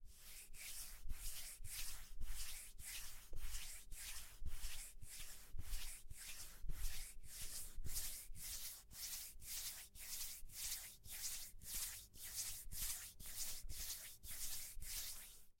Scratching beard cheek with hand. Close mic. Studio
cheek, Scratching, hand